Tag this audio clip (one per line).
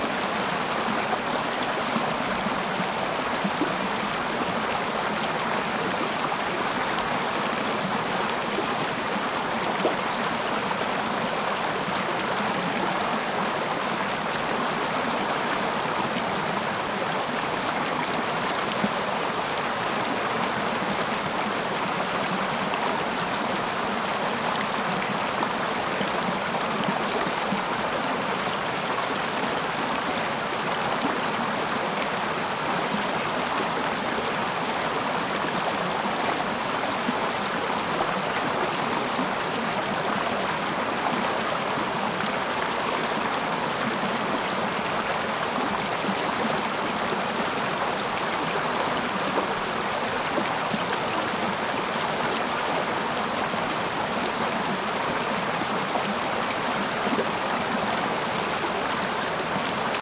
Dam
Water
Background
Mortar
Stream
Waterfall
Splash
Flow
Ambience
Nature
Creek
River